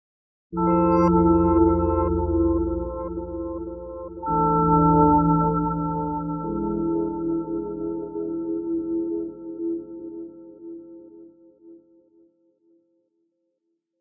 Guitar swell 1
Some guitar chords with volume pedal and fx
ambient, guitar, delay